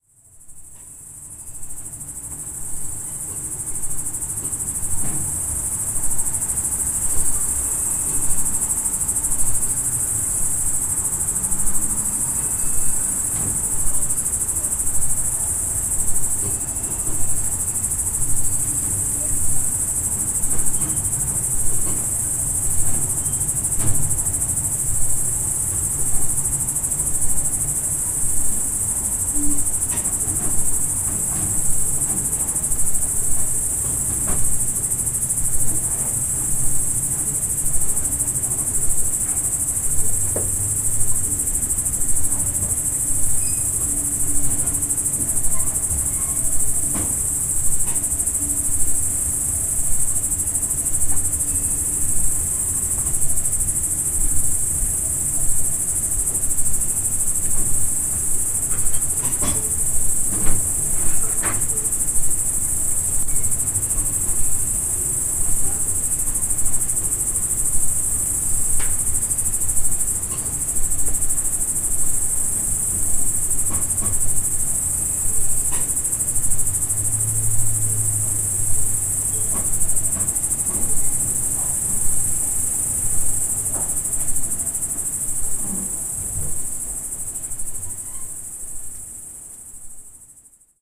an ambient field recording thru a window above a cows stable on a farm in the Swiss emmental valley.
Loud grasshoppers or crickets and the noises of cows moving around in the stable below